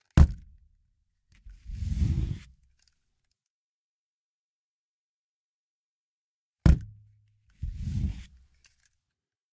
Placing a gun on the table and pulling it off
slide, draw, revolver, place, gun, pull